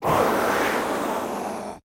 cell screams 11
Short processed samples of screams